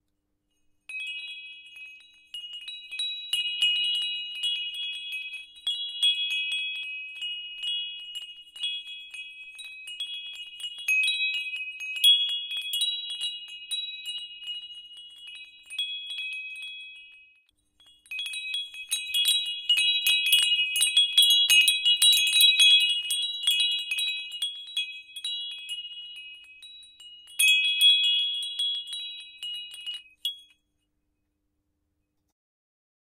Wind chimes being swayed at different speeds.